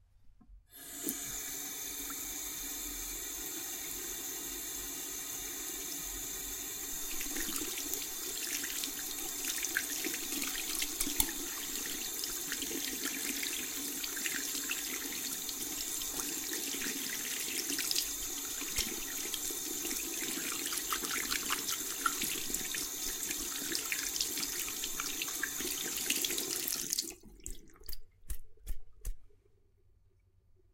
washing hands in the sink
turning on the water in the sink and washing the hands in the running water. at the end turning the water off.
splash, sink, water, wash, hygiene, bath, lavatory